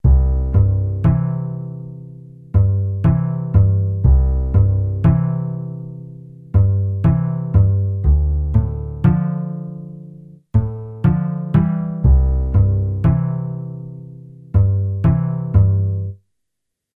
accoustic loop 120bpm
harmless bass chord progression.